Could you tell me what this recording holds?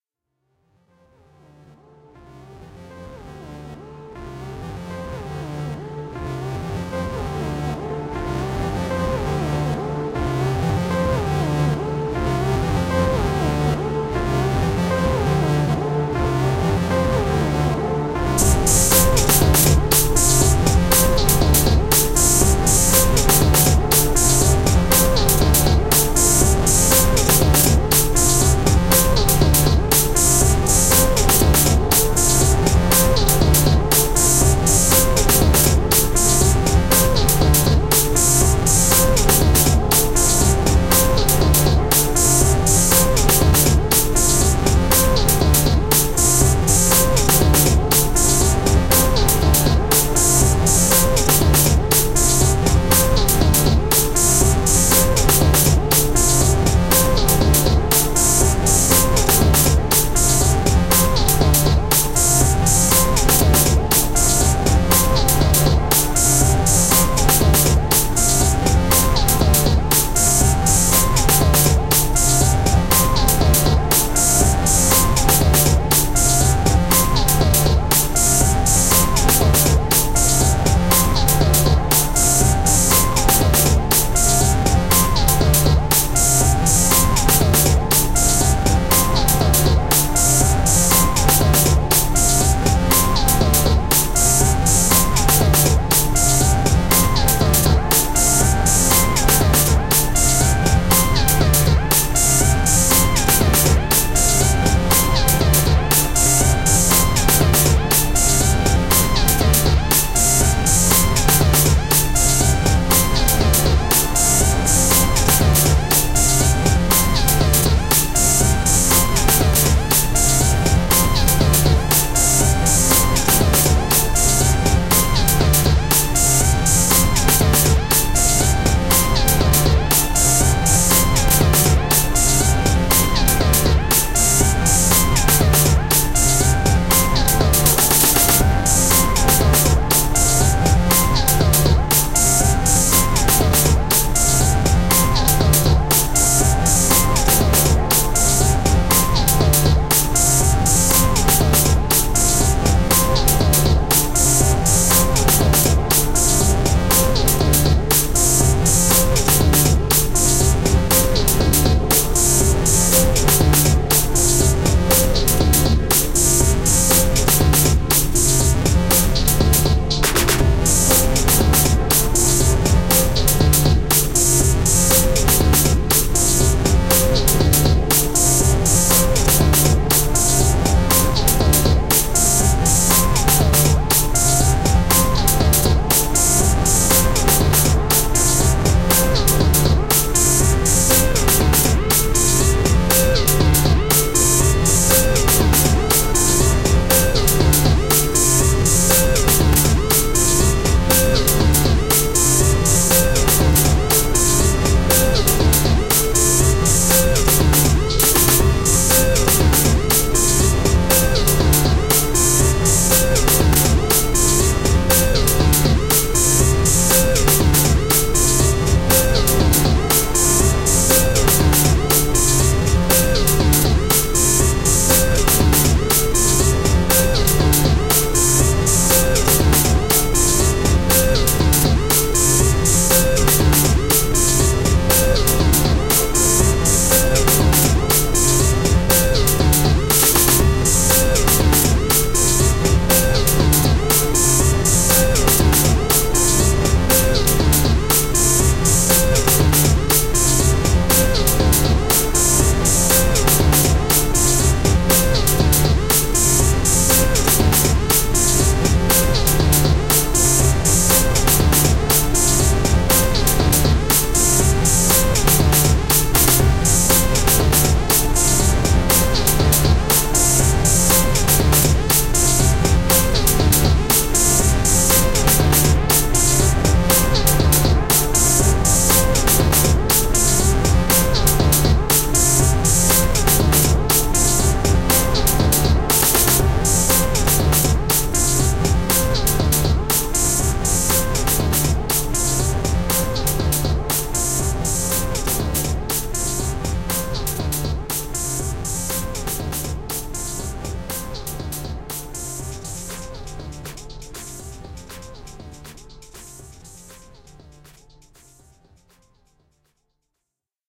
Infinite Meteor Rain
few analog synths